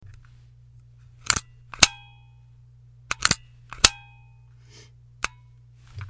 The opening and closing of a stapler